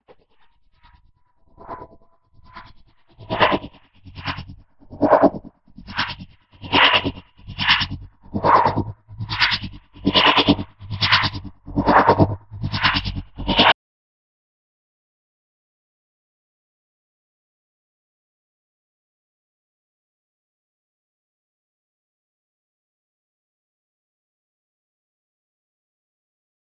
A noise rising.